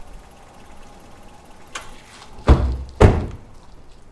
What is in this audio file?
AMBIENTES DE FOLEY sonido del auto puertas al cerrar